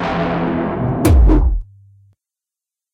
A reverse stab
bounce, club, drop, dub, dub-step, effect, electro, electronic, fx, glitch-hop, hardstyle, house, Production, rave, Reverse, stab